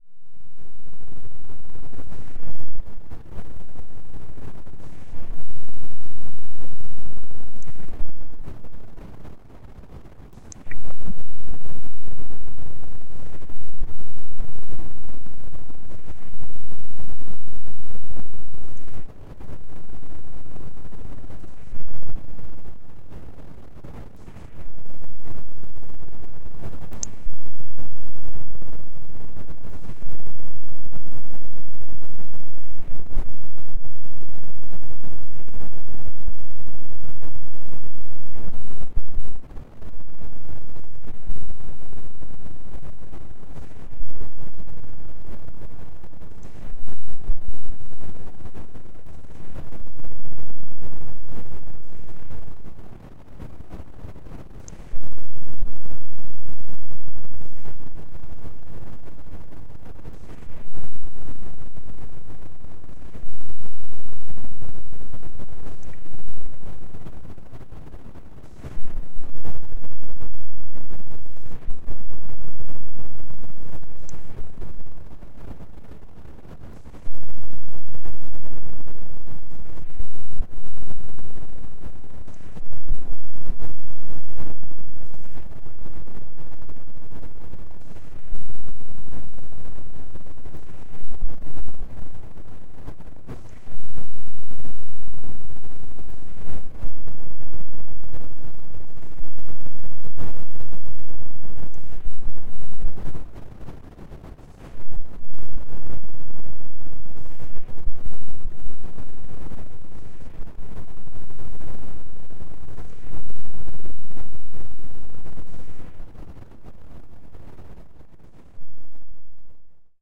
Noise Garden 23
1.This sample is part of the "Noise Garden" sample pack. 2 minutes of pure ambient droning noisescape. Soft wind noise plus droplets.
noise, reaktor, electronic, soundscape, effect, drone